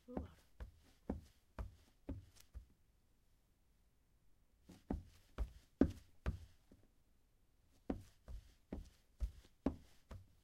Short clip of walking on a wooden floor wearing socks.